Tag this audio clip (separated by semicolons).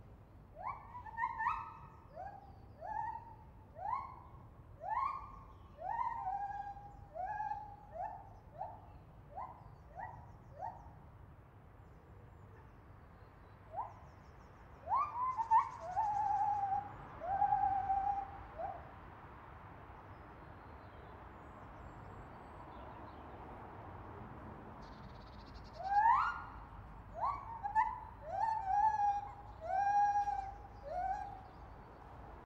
Gibbon; Monkey; Nature; Monkey-Call; White-Cheeked-Gibbon